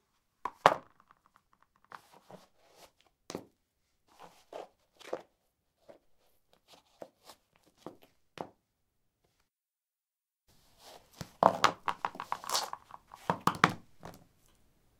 concrete 09d highheels onoff
Putting high heels on/off on concrete. Recorded with a ZOOM H2 in a basement of a house, normalized with Audacity.
footstep, footsteps, step, steps